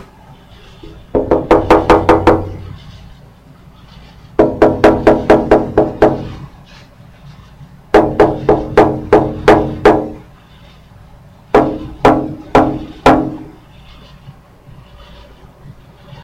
Knocking on Window
Sound of someone knocking on a house window. I needed this sound for an audio drama I was working on. Recorded with an Hp laptop.
sound, window